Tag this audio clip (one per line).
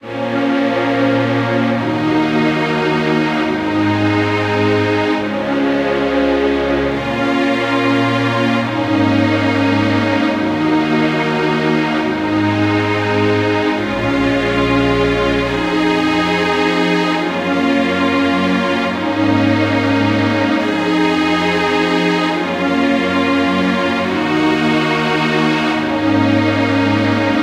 Chord
Cinematic
Film
Loop
Movie
Orchestra
Progression